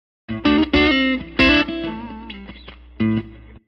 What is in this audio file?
blues lick in A #1
a little guitar blues lick in A
guitar, blues